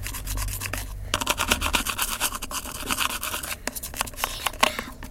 sonicsnaps EBG 11a
Scratching with a stone on the street.
Field recordings from Escola Basica Gualtar (Portugal) and its surroundings, made by pupils of 8 years old.
Escola-Basica-Gualtar, sonic-snap